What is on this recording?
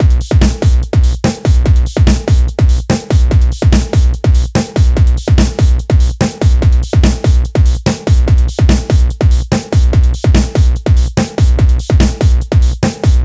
Samsara EKD was used for the kick, sent through EQ and a couple of distortion plugins.
Black Noh Snare was used for the snare, with a resonator and reverb placed on top of it, as well as EQ and compression.
HCX was used for the hihats, with one EQ plugin placed on it.
The master channel got brickwalled (or bricked) using CompressiveCM vst.